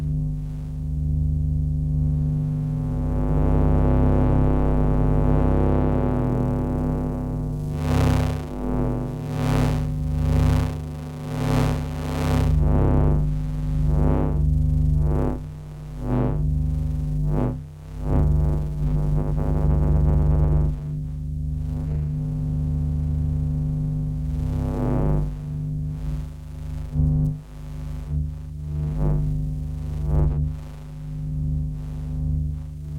SpaceEngine Running Loop 00
An electric space engine looping sound to be used in sci-fi games, or similar futuristic sounding games. Useful as background noise to emphasize that a large engine, or some other complex device, is currently active.